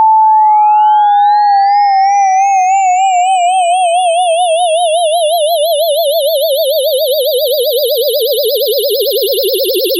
Generated with Cool Edit 96. Sounds like a UFO taking off...